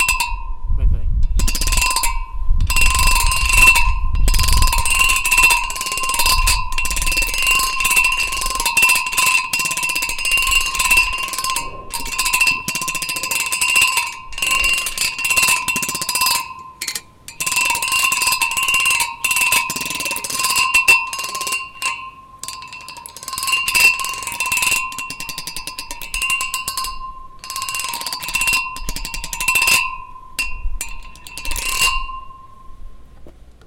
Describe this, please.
Metal drain sticks
Metal drain played with plastic stick
drain, plastick-stick, metal-drain